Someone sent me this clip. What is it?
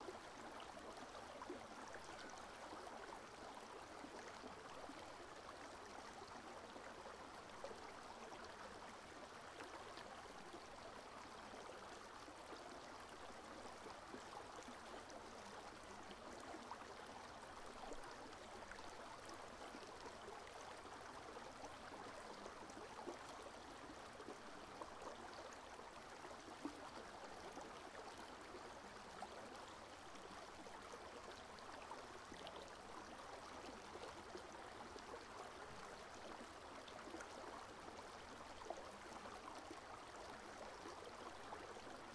LR FRONT STREAM WOODS QUEBEC SUMMER
Summertime recording of a medium size running stream in woods. Quebec, Canada. This is the front pair of a 4channel recording made on an H2.
running, stream, water